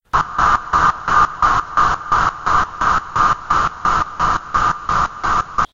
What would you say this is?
Steam train (sythesized) 01
Original track has been recorded by Sony IC Recorder and it has been edited in Audacity by this effects: Paulstretch, Tremolo and Change tempo/pitch.